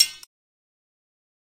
Bottle Cap Glitch #2
a glitch in the system is an opening. a malfunction creates a perceptual crack where the once inviolable and divine ordering of life is rendered for what it is: an edifice, produced and maintained through violence and cruelty, a thin veneer that papers over its gaping emptiness. To take the glitch as invitation to invent anew; this is the promise of field recording capital's detritus.
Recorded with a Tascam Dr100.
drum-kits, field-recording, percussion, sample-pack